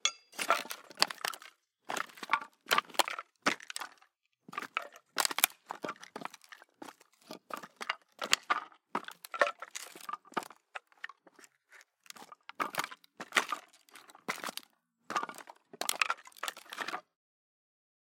SFX wood stone floor walking trample pile
SFX, wood, fall, pile, bunch, stone floor, drop, falling, hit, impact
SFX, pile, impact, falling, bunch, drop, floor, fall, wood, stone, hit